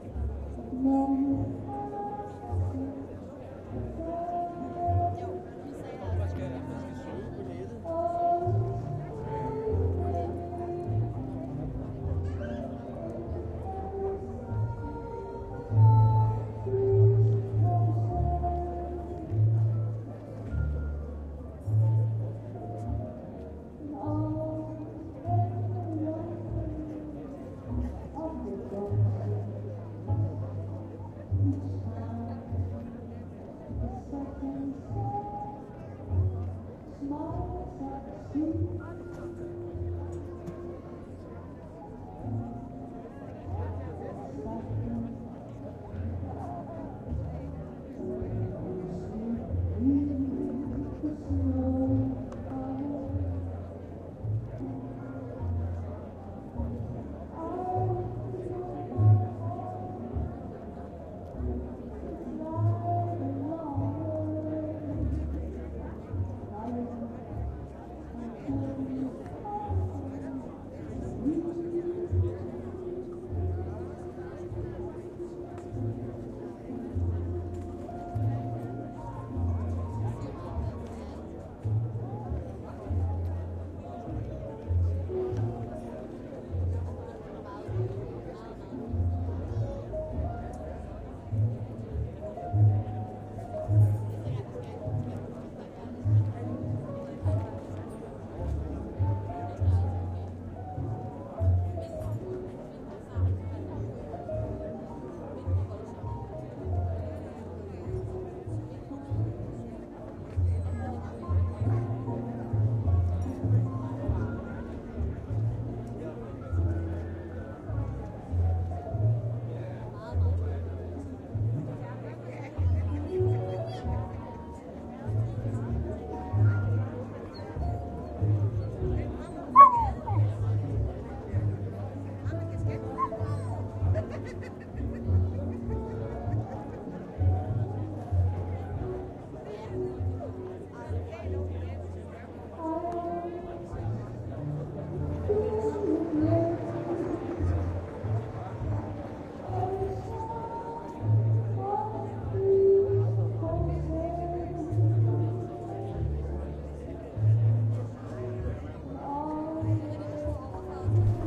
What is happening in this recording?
Copenhagen jazz atmosphere 2012-07-10
Passed by a randon concert in Copenhagen, during the yearly jazz festival, and decided to record a little atmosphere. Recorded with a Zoom H2.
people, Jazz, festival, concert, music, copenhagen, song, laugh, singer, atmosphere, cph, talk, audience, chat, field-recording